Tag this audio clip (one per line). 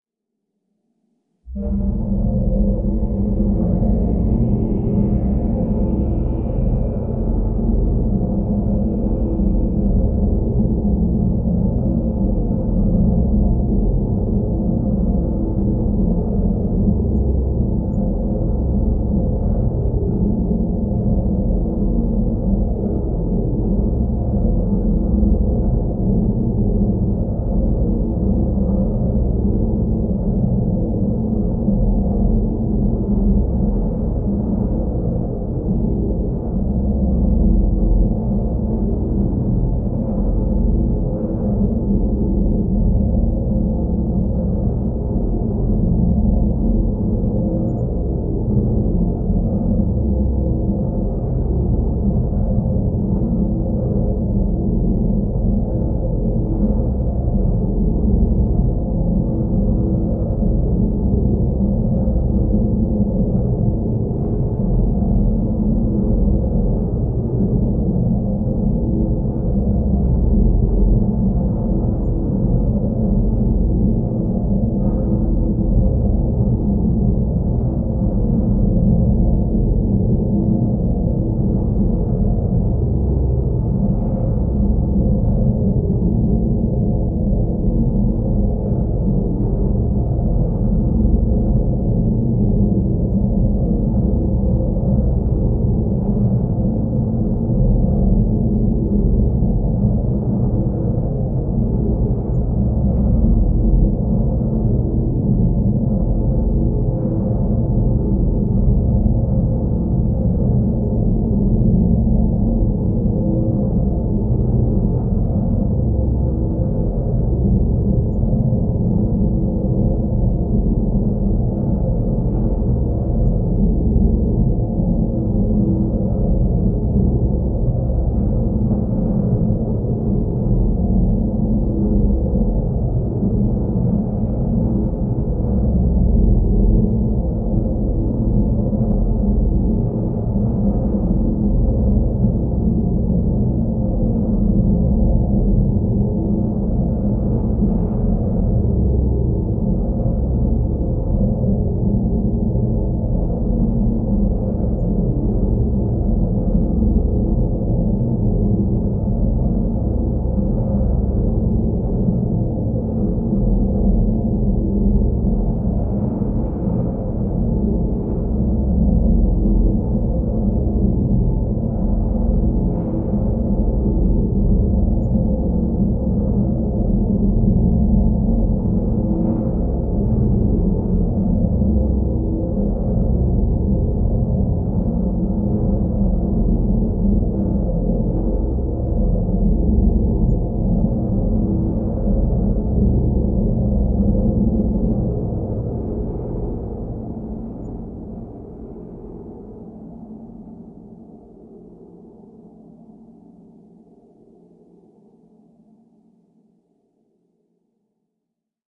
artificial
drone
evolving
experimental
multisample
soundscape